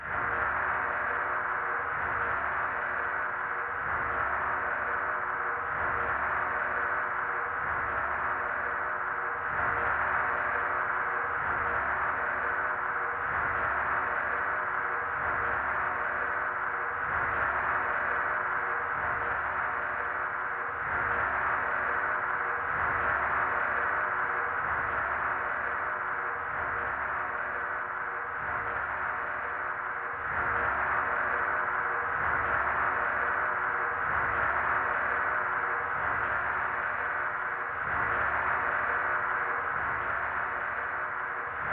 Horror Chase
A short layer of rhythmic clanging created as a scary atmosphere in a chase scene. I've found this method results in good sounding recordings, but reduced high frequencies. Sorry, don't know the BPM.